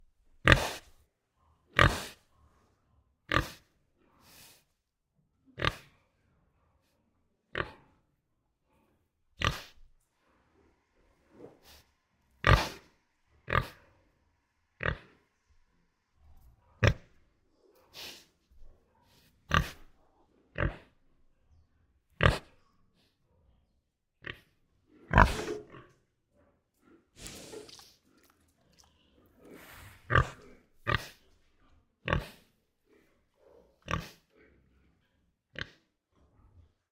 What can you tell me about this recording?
Big pig loud oink
Big pig oinking loudly in a barn, recorded at Kuhhorst, Germany, with a Senheiser shotgun mic (sorry, didn't take a look at the model) and an H4N Zoom recorder.
countryside, interior, loud, oink, pig